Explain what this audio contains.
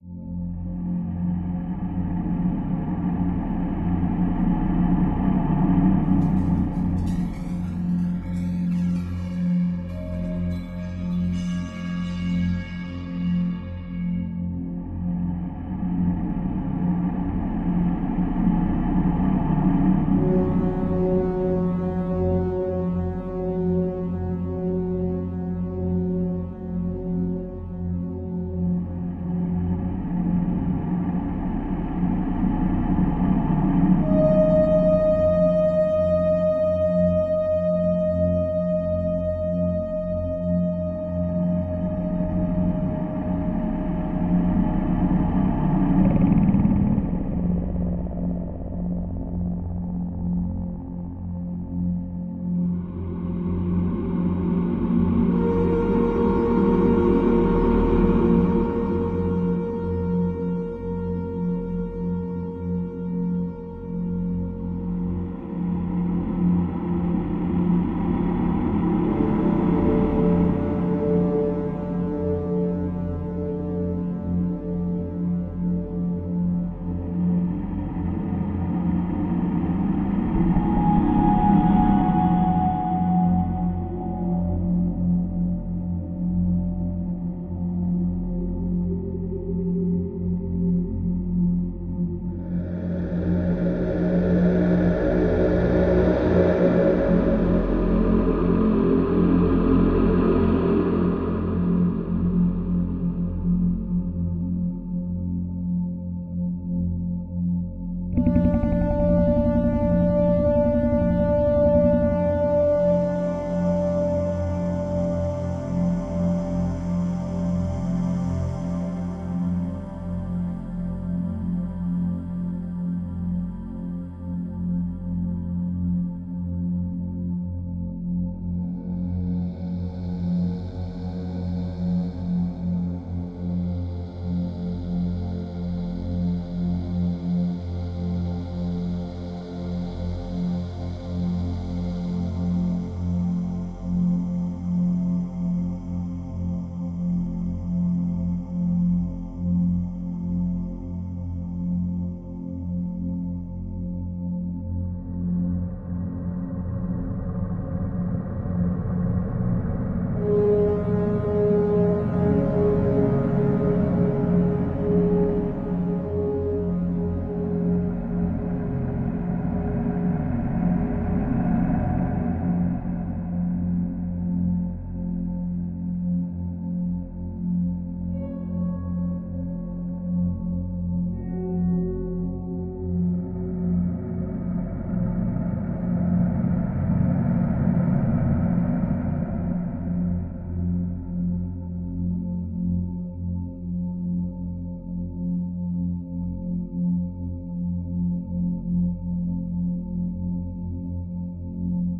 breaking world
This long dynamic ambient soundtrack can be used for creating some creepy and dark atmosphere for any project!